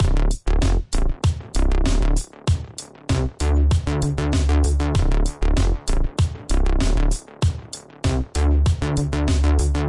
Drum&Synth BassFunk Dm 4
Ableton-Bass, Bass-Sample